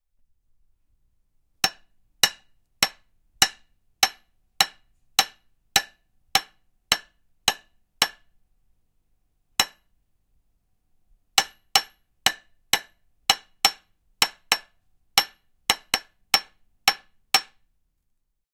hammering nail
hammer, nail, steel, nails, iron